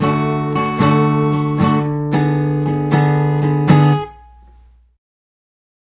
acoustic d pickstrumpattern2
Pick strumming around a D chord pattern on a Yamaha acoustic guitar recorded with Olympus DS-40/Sony mic.